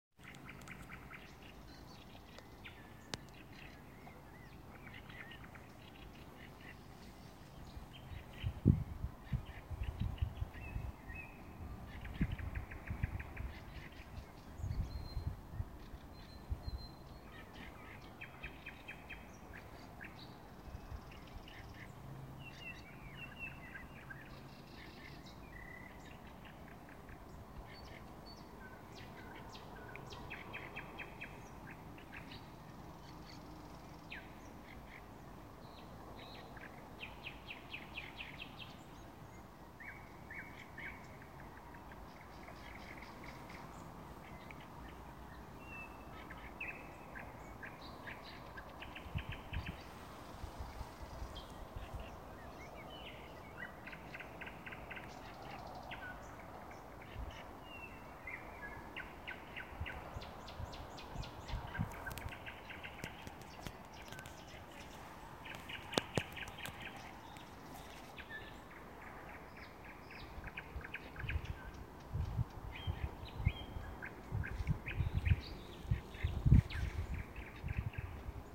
Forest birds Finland
Birds in Finnish forest
ambient, birds, wind